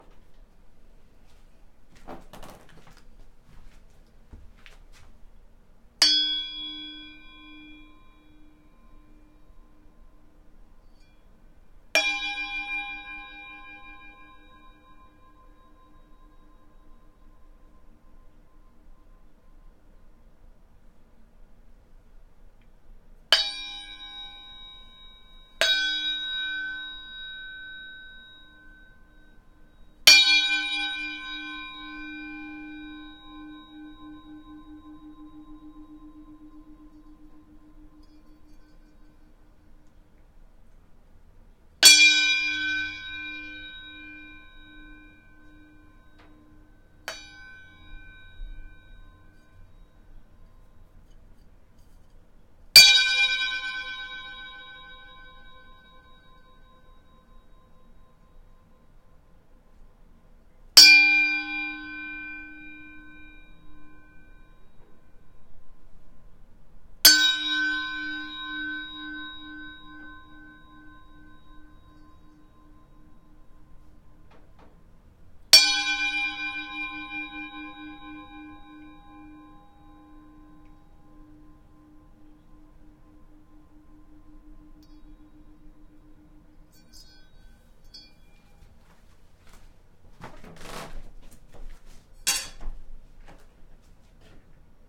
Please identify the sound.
Lil' one
A metal bar on a string.
chimes, metal, metal-bar, metallic, percussion, rotation